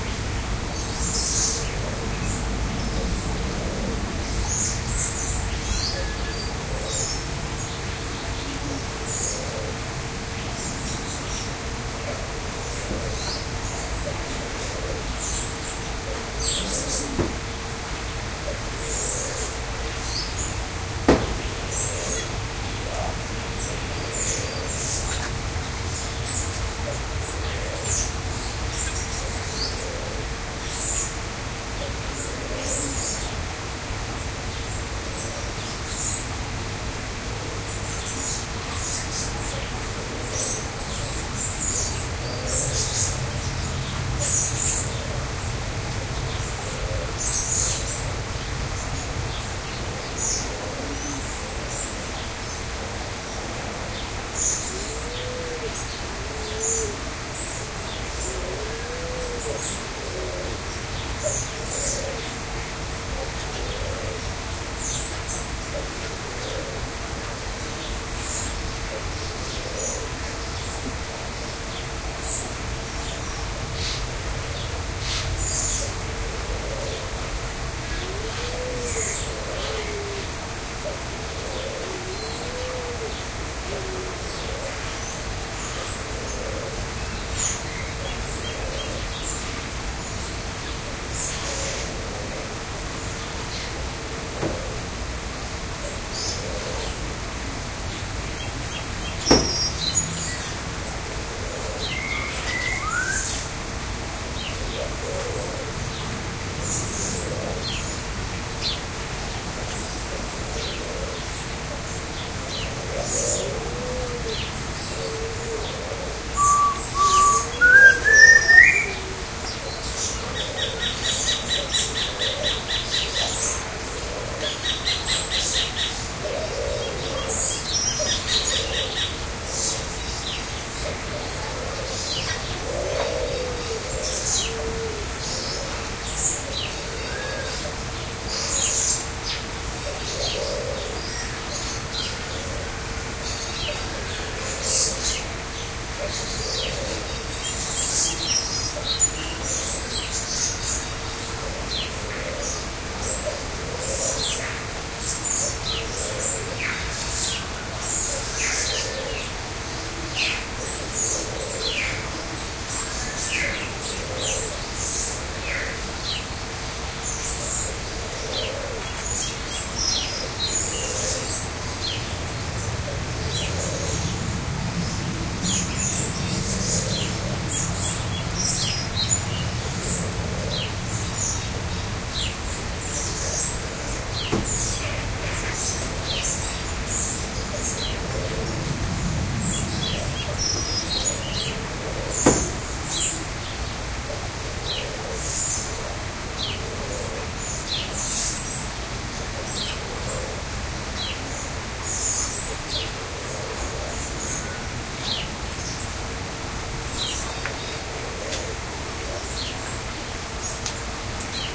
asian aviary01
Recorded at the San Diego Zoo. Inside an Asian rain forest aviary, with a waterfall and many different birds. Birds in this recording include several species of lorikeet, Green Imperial-pigeon, Collared Imperial-pigeon, Fawn-breasted Bowerbird, Song Sparrow, Spotted Laughingthrush and Micronesian Kingfisher.
asian, aviary, birds, dove, exotic, field-recording, kingfisher, pigeon, water